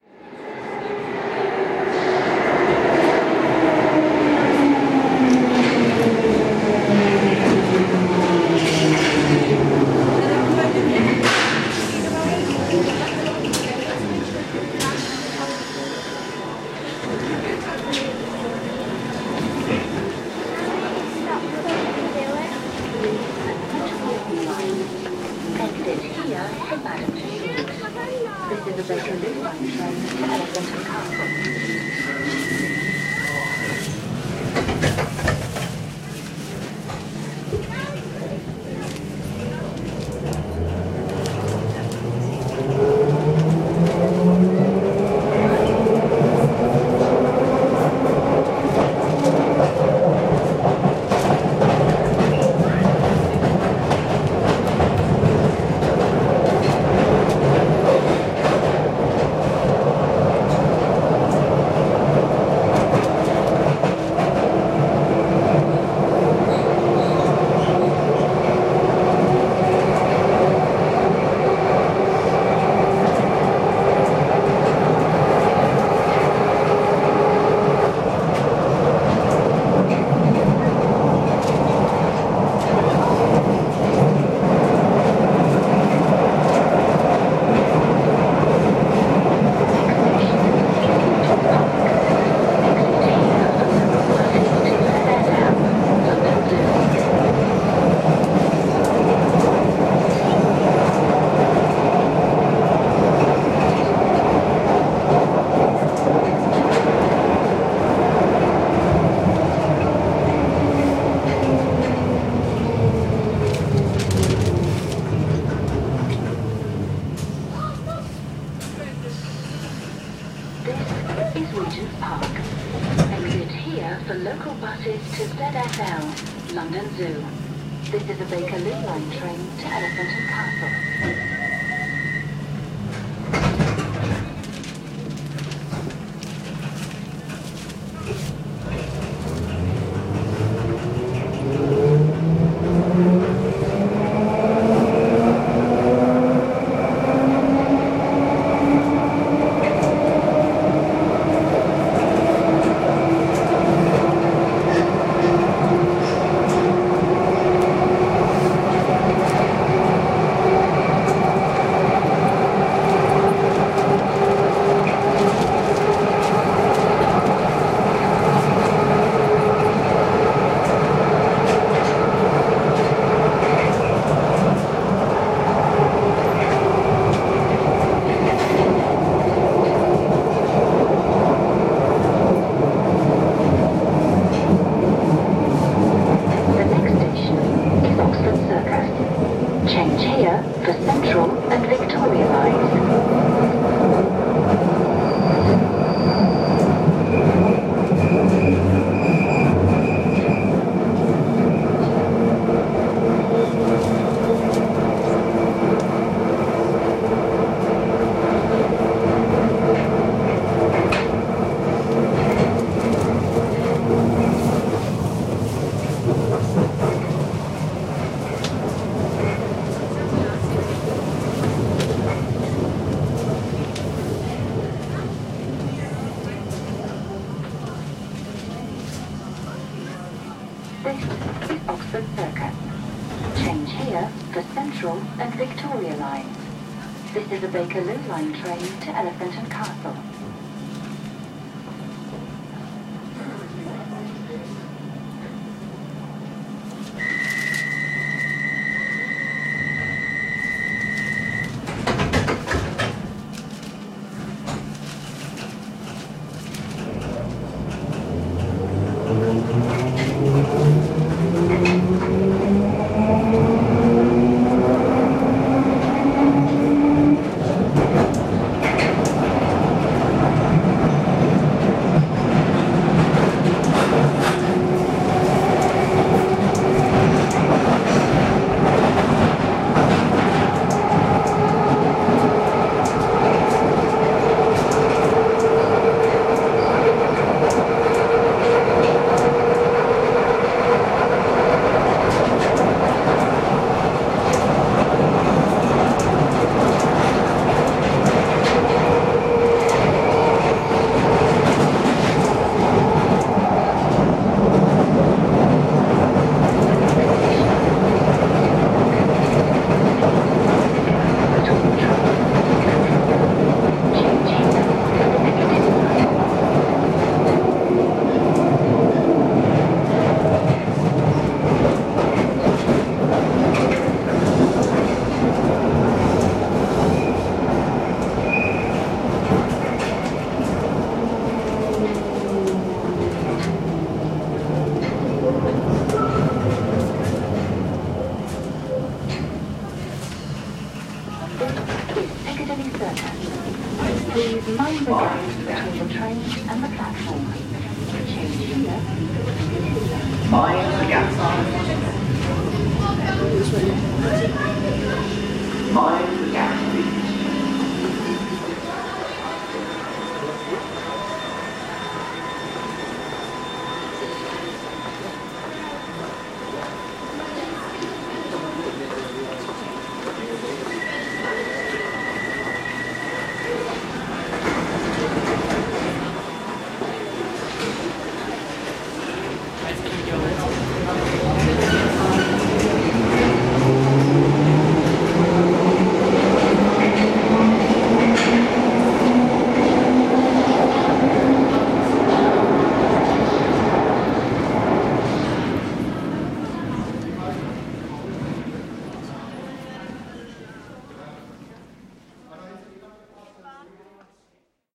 London Underground- Baker Street to Piccadilly Circus
Late-night journey on the tube between Baker Street and Piccadilly Circus tube stations. Train arriving and departing, door sounds and beeps, in-train announcements. Recorded 18th Feb 2015 with 4th-gen iPod touch. Edited with Audacity.
ambiance, ambience, arrive, bakerloo, beeps, close, depart, doors, field-recording, london, london-underground, open, oxford-circus, people, piccadilly-circus, regents-park, talk, tube, tube-station, underground